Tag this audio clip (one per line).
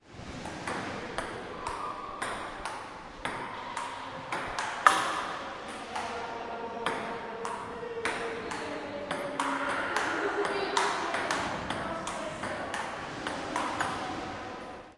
map
sound